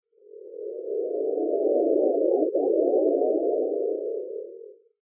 Alien in throws of passion.
alien, ambient, moan, space, synth